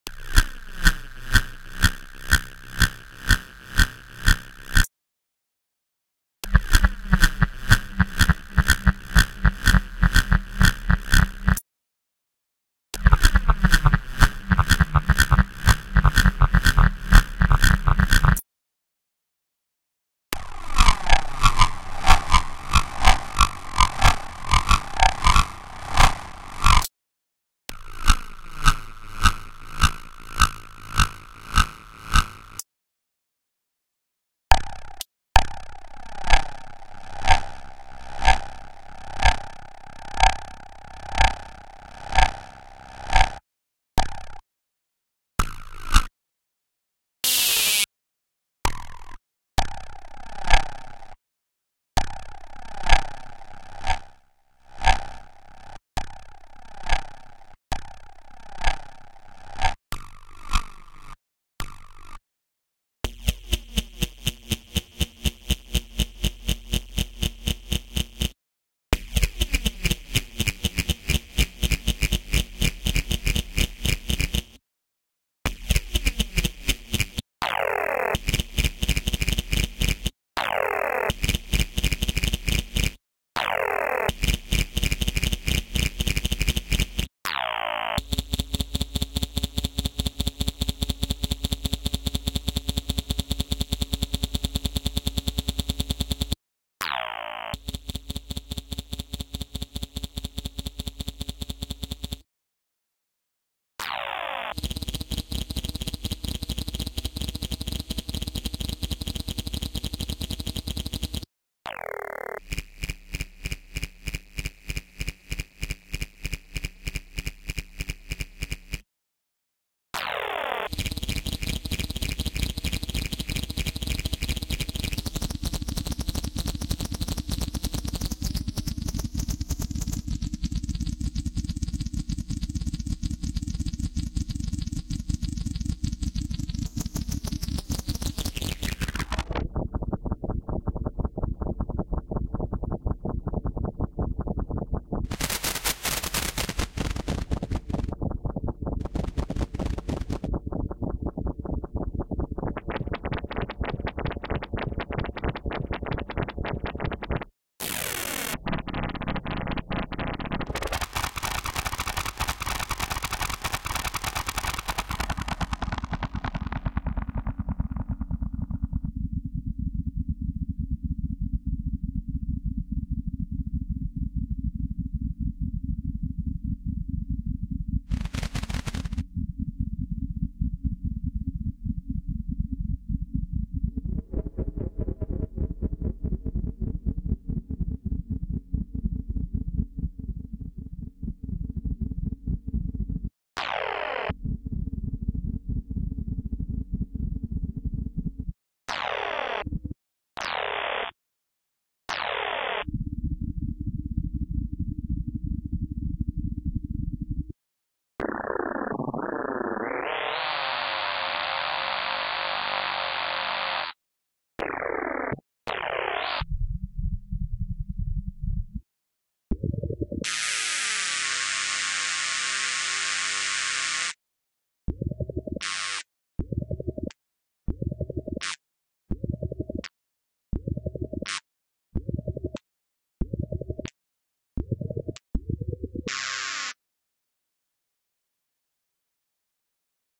Various repetitive engine thrums and scientific glitches.